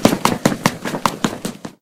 Running away (on solid floor)
Running off the screen.
Recorded for the visual novel, "The Pizza Delivery Boy Who Saved the World".